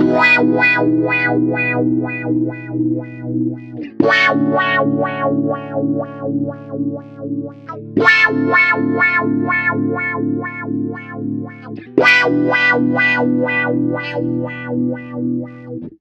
Wah-wah on stratocaster guitar. Recorded using Line6 Pod XT Live.